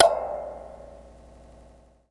Impulse responses made with a cheap spring powered reverb microphone and a cap gun, hand claps, balloon pops, underwater recordings, soda cans, and various other sources.
spring, response, impulse, convolution, reverb